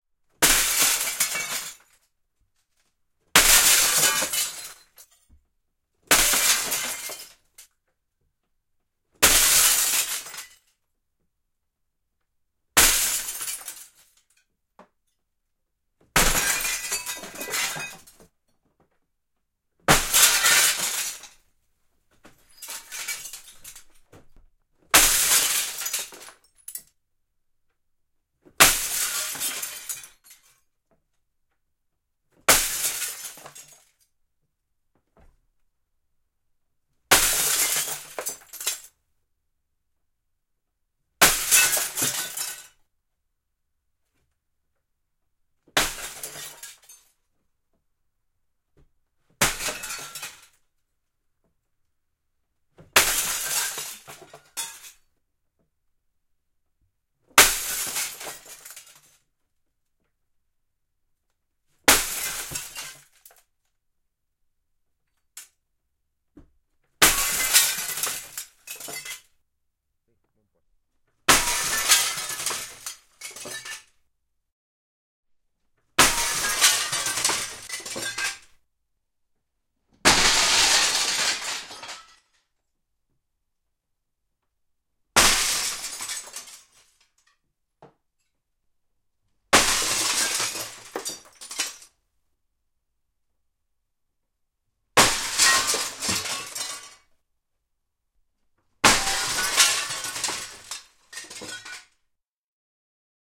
Ikkuna rikki, sorkkarauta / Windows break, breaking glass, panes, with crowbar, splinters tinkle
Ikkunoita, lasiruutuja rikotaan sorkkaraudalla, lasin, sirpaleiden kilinää.
Paikka/Place: Suomi / Finland / Vihti, Leppärlä
Aika/Date: 23.10.1995
Suomi, Rikkoa, Break, Ikkuna, Lasiruutu, Sirpaleet, Sorkkarauta, Ruutu, Field-Recording, Soundfx, Glass, Pane, Crowbar, Splinter, Tinkle, Finnish-Broadcasting-Company, Yleisradio, Window, Yle, Finland, Lasi, Tehosteet